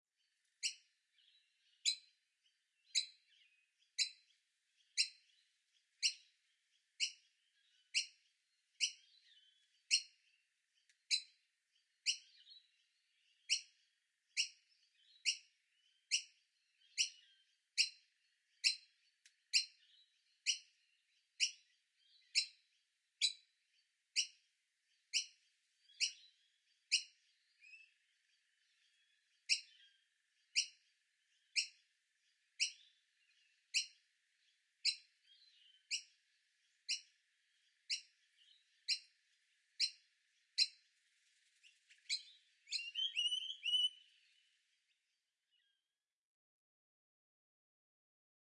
Noisy Miner Chick FeedMe
Noisy Miner chick begging for food on my front veranda. At the end you can hear the alarm call of the parents as they spot me and my furry rode blimp which looks a lot like a cat. Recording chain: Rode NT4 stereo mic - Edirol R44 digital recorder. Range ~ 2 meters.
melanocephala, peep, nousy, miner, chick, cheep, bird, manorina